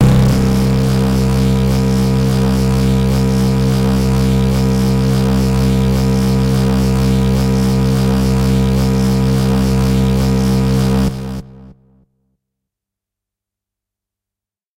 Harsh Lead - C1
This is a sample from my Q Rack hardware synth. It is part of the "Q multi 010: Harsh Lead" sample pack. The sound is on the key in the name of the file. A hard, harsh lead sound.